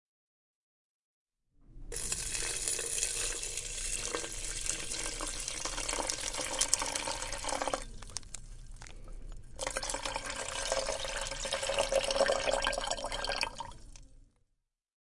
AGUA NA PANELA
Som de agua caindo na panela
Agua, Cozinha, Cozinhando, Water